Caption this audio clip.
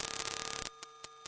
Static Loop
Easily loop-able static glitches.
bend, bending, bent, circuit, circuitry, glitch, idm, noise, sleep-drone, squeaky, strange, tweak